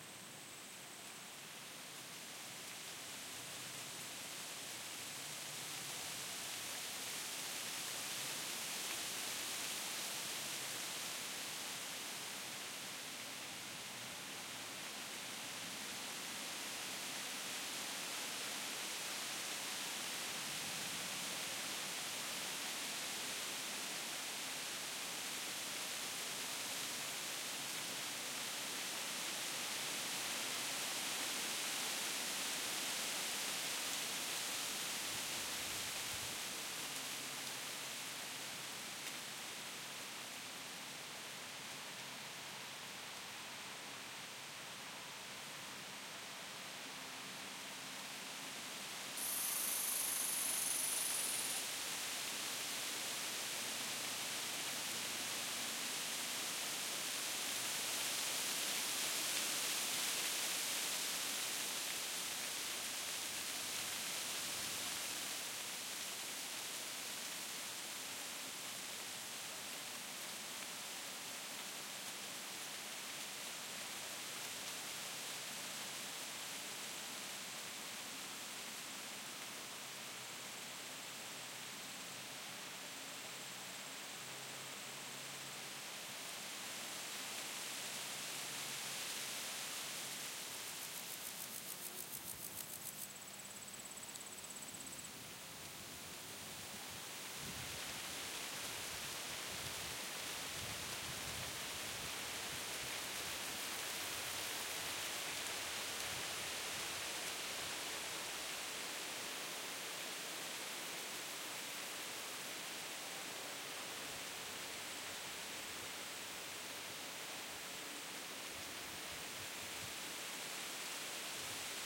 wind through aspens leafy1
recorded with Sony PCM-D50, Tascam DAP1 DAT with AT835 stereo mic, or Zoom H2